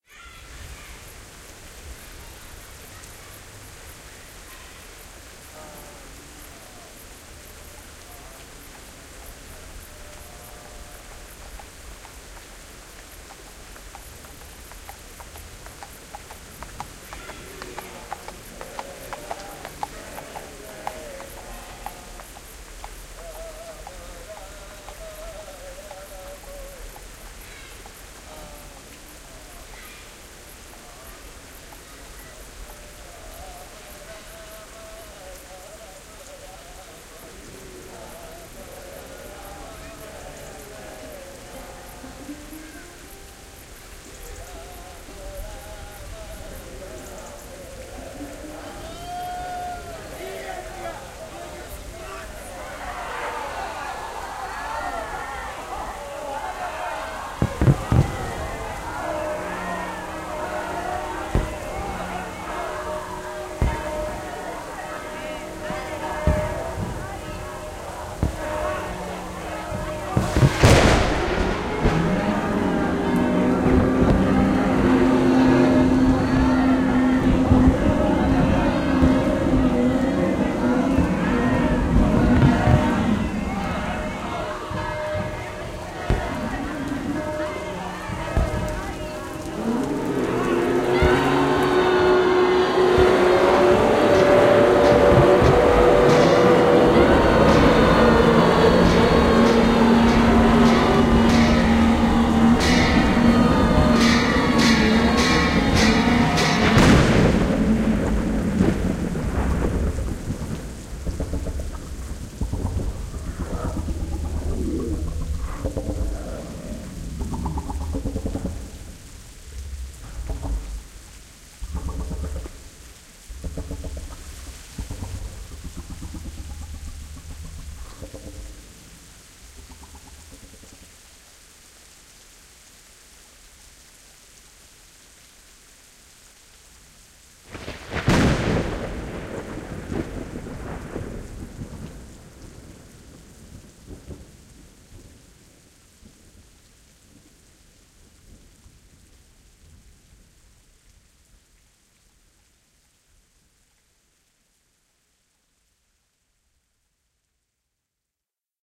The Razing of Aulkozt'Ineh
With this, I am trying to portray a peaceful village that is suddenly assaulted by some nightmarish horrors just beyond the outer walls. No one survives...
monsters, strange, scary, village, chaos, eerie, distant, horror, sound-effects, Halloween, ambient, massacre, cacophony